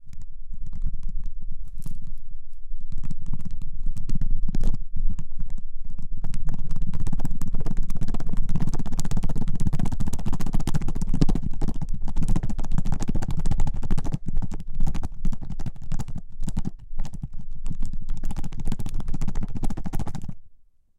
thrashing rubling bubble tea balls
shaking smacking bubbletea balls in empty pot
thrashing, low, shaking, fish, rumble